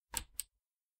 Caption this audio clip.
Button Click 02
The click of a small button being pressed and released.
The button belongs to a tape cassette player.
Click, Tape, Player, Release, Button, Machine, Casette, Press